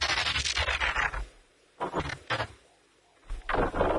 A few years ago, while experimenting with the newly release "Gross Beat" effect plugin, I stumbled over a possible way the "transform" up and "transform" down sounds were designed/made in the mid 80's --the chief mechanic is I believe a "spin" down with a turntable for starters... here is "Down"
Machine, Mechanical, Robot, Transformers